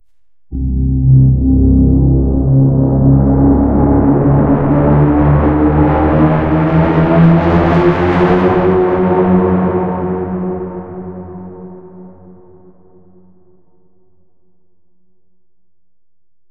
Entirely made with a synth and post-processing fx.
dramatic, film, increasing, sfx, suspense, cinematic